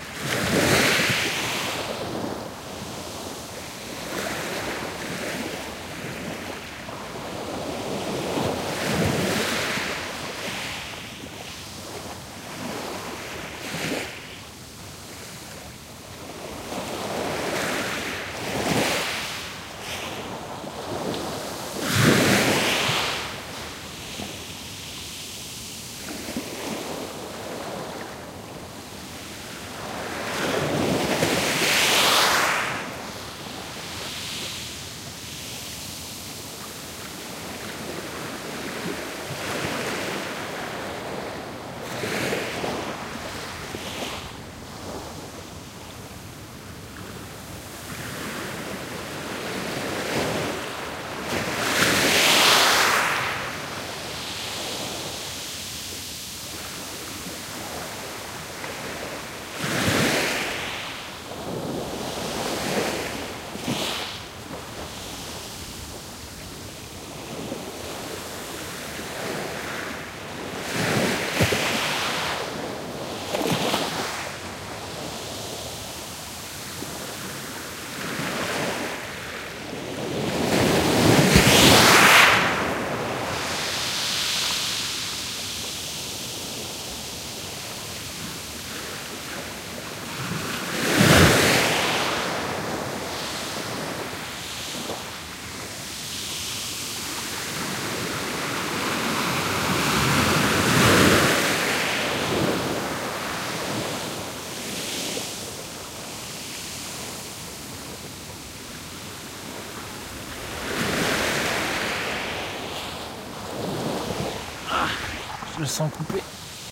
Ocean waves on small pebbles
Recorded near Saint Guirec (France) with a Sony PCM D-100.
beach, ocean, seaside, water, waves